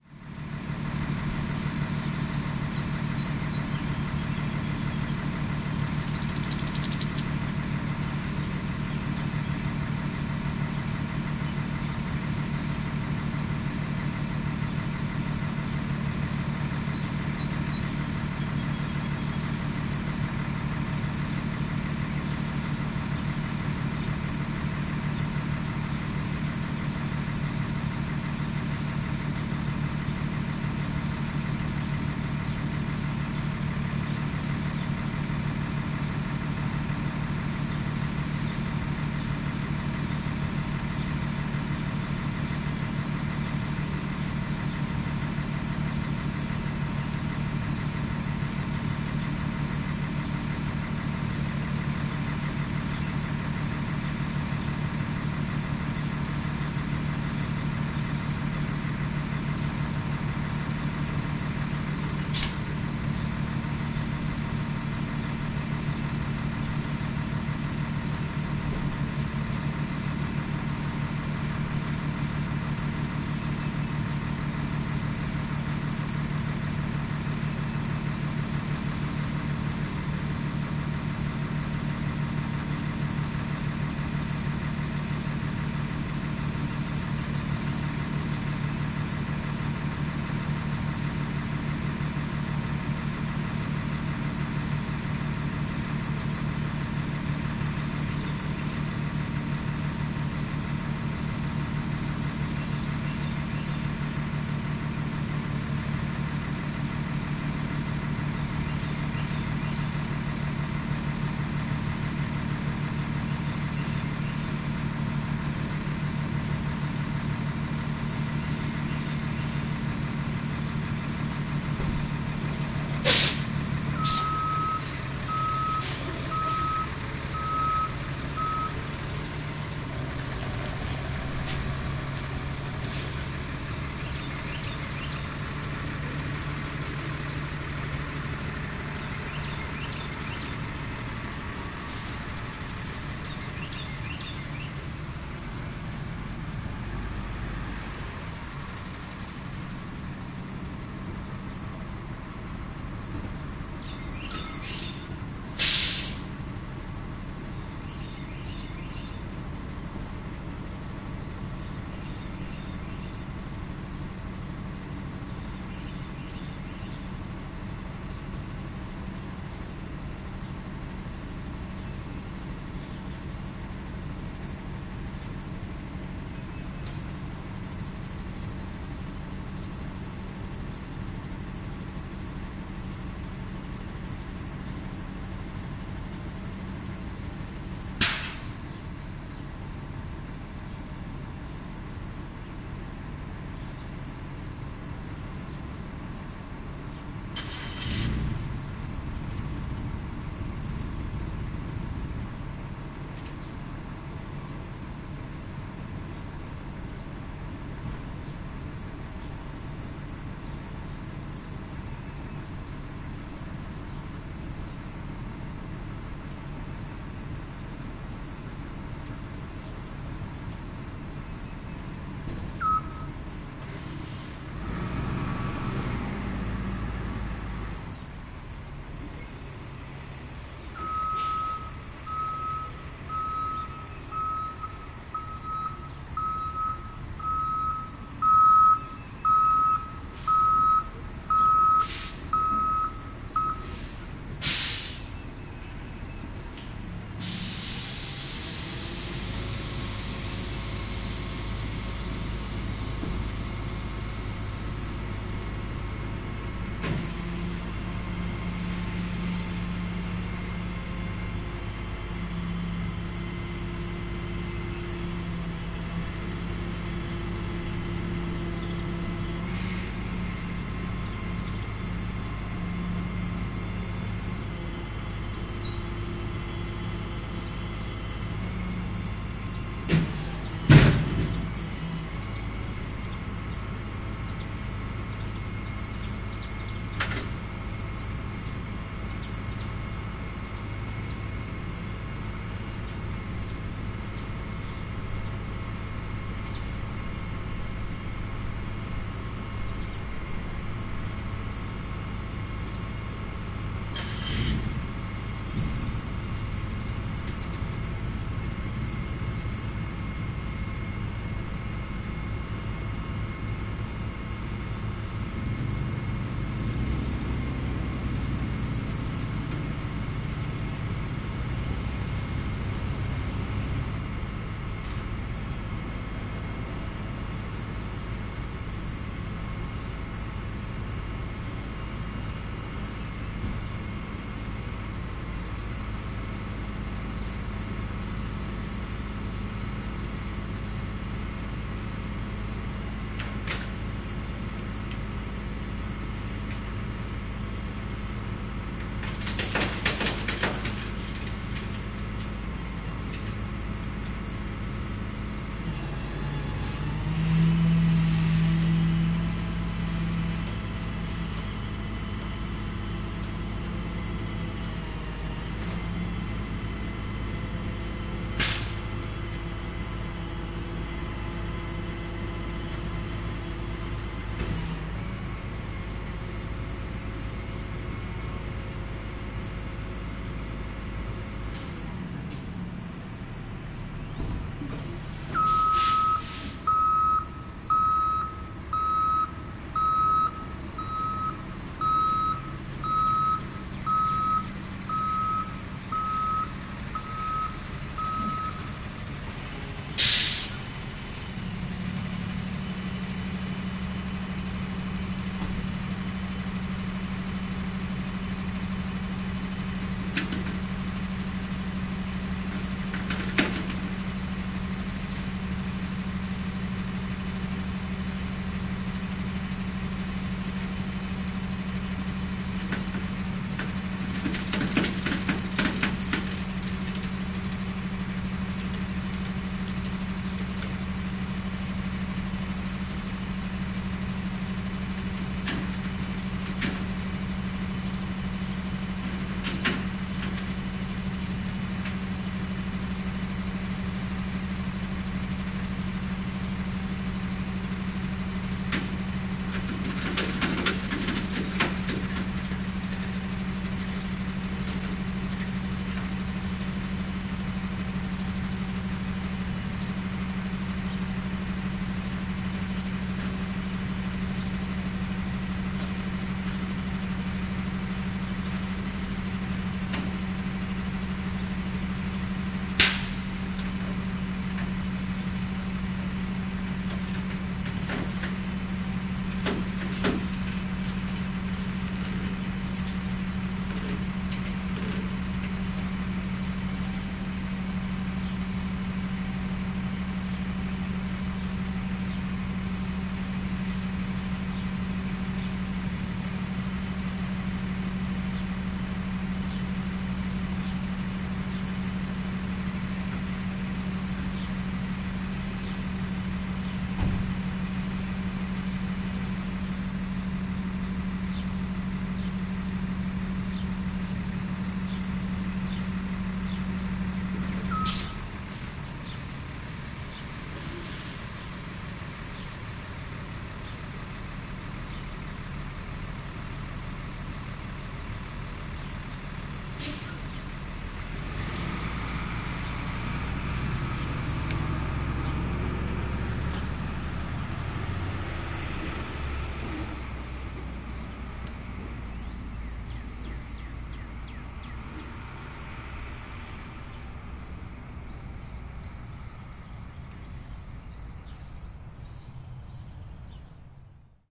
Flatbed Tow Truck Picking Up Car
A diesel flatbed tow truck idles for two minutes, moves the truck into position,backup warning beeps, moves the car back, adjusts the position of the truck once more, extends the rollback tilt-tray, connects the car, winches the car up, retracts the rollback tilt-tray, secures chains to the car, then drives off.
ADPP, auto, backup, beep, car, city, diesel, engine, field-recording, flatbed, motor, recovery, reverse, street, suburb, tilt-tray, tow, truck, warning, winch